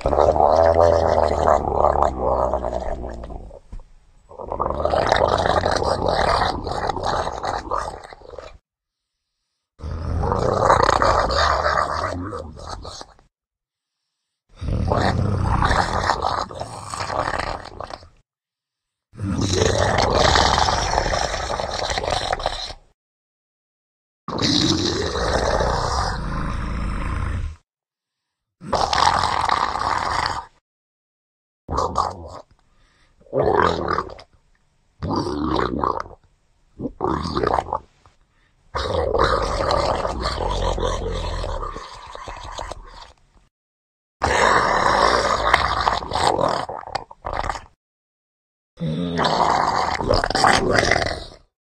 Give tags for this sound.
disgusting; ew; gargle; gross; gurgling; monster; yucky

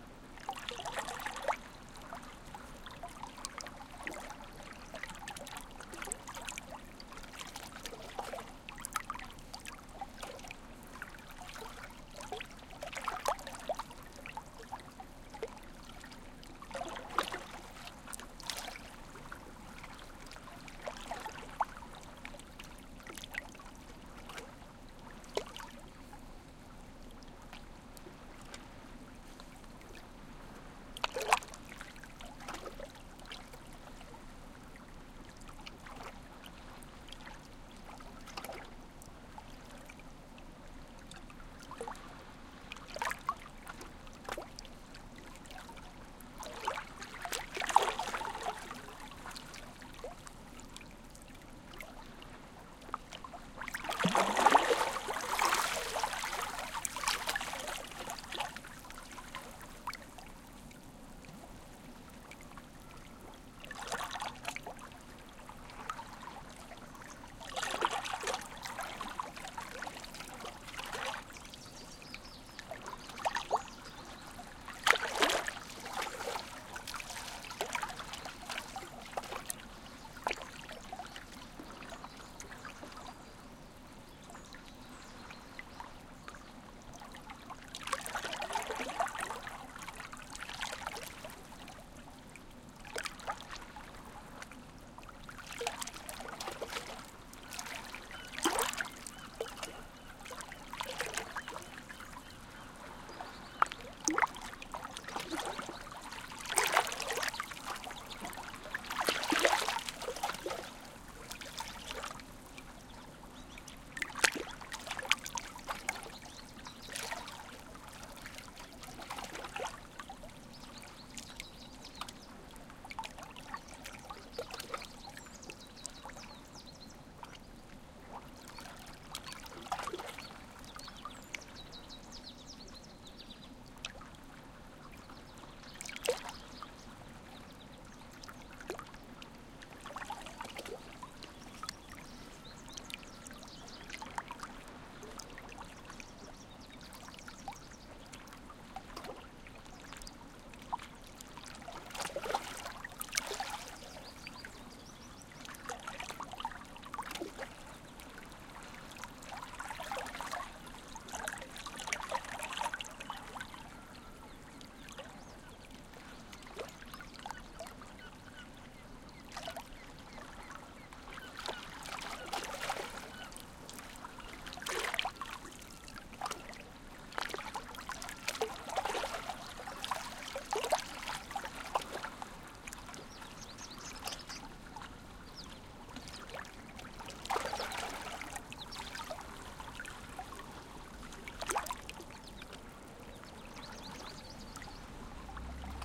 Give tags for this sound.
beach; field-recording; flickr; nature; splashing; summer; water; waves

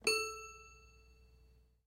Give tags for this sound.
sounds; Circus; toy-piano; Piano; packs; Toy; Carnival

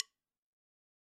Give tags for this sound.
click; countdown; metronome; one; one-shot; shot; snare; wood

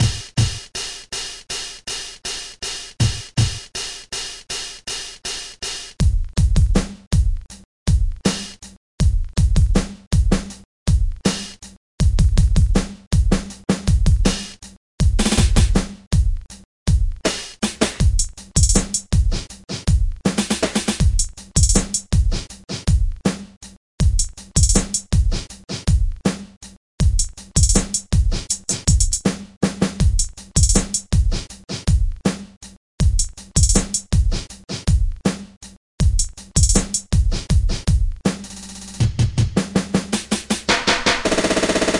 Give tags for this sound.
drum; rap; beat; ghetto; hip-hop

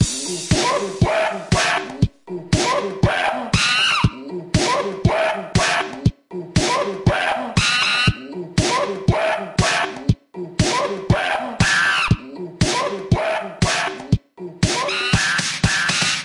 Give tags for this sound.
Beat; Monkey; Loop

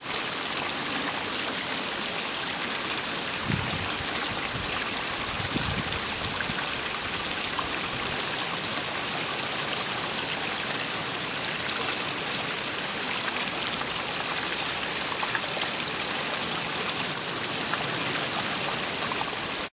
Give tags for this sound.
fast; flowing; water

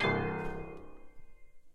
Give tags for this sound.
classic; classical; drama; dramatic; hit; mystery; piano; sting

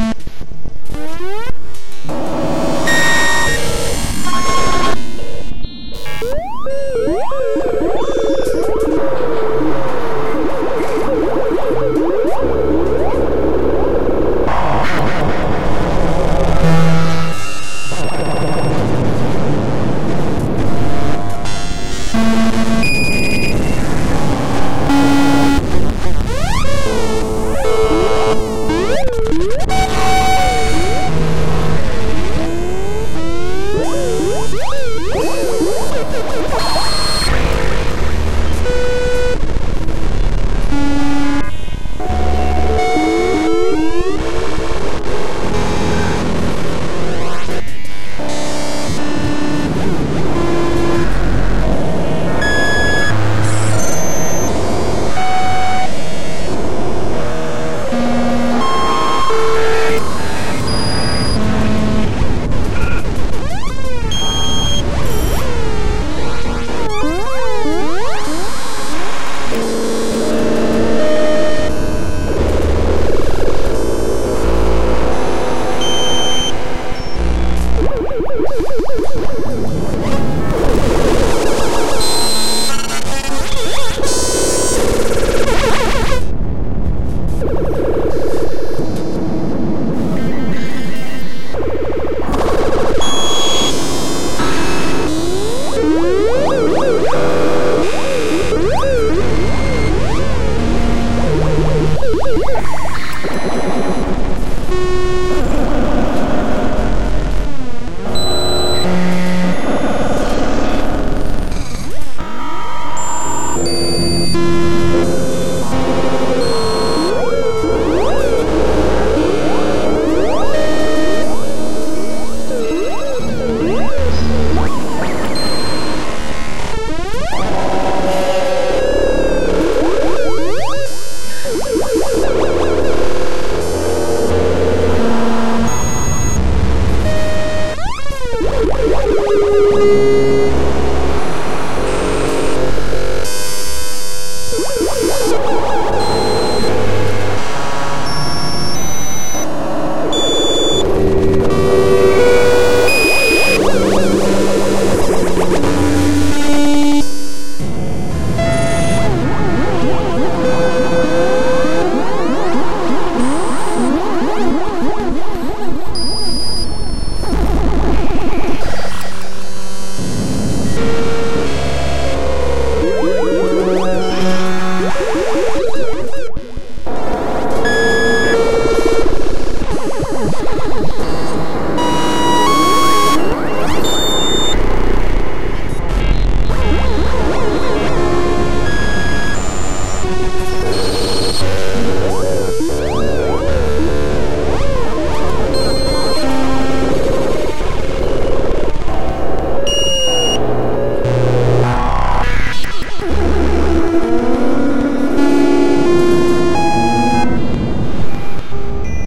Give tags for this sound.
synthesizer; synth; weird; horror; terror; scary; noise; modular

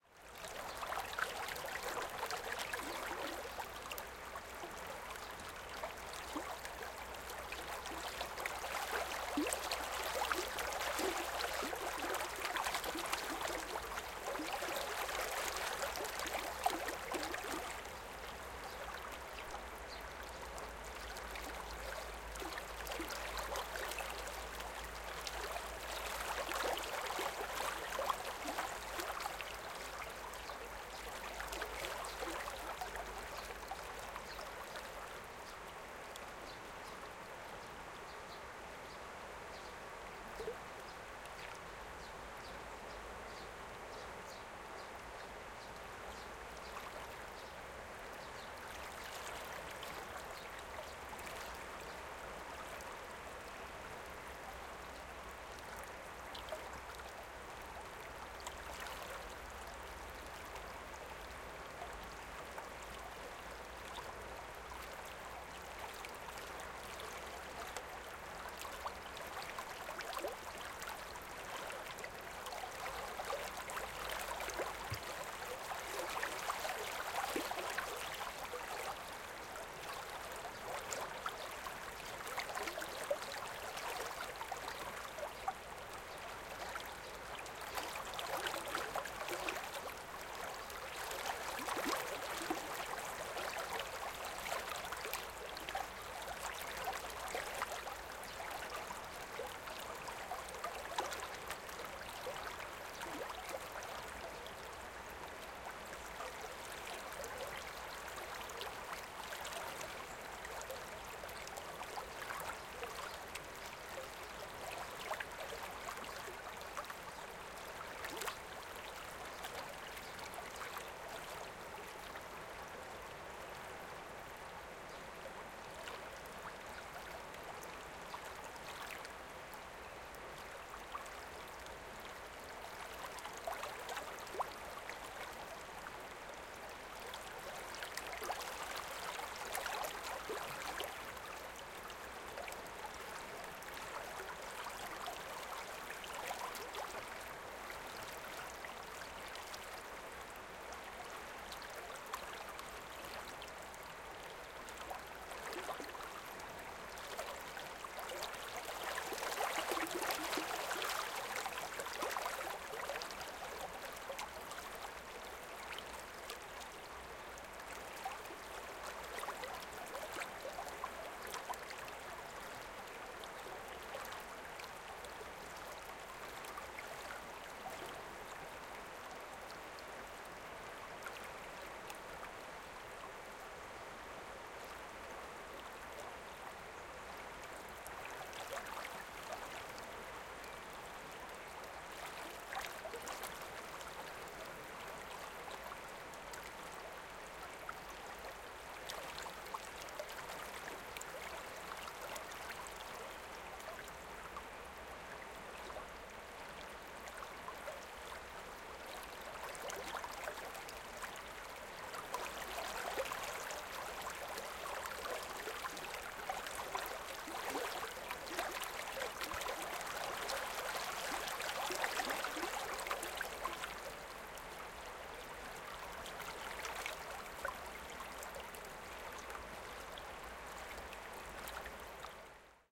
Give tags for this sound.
Nature; Serbia; River

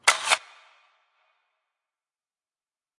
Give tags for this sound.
Bushmaster; Gun; Gun-FX; M4; Magazine

